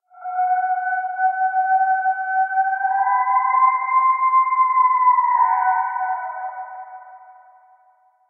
howl processed sound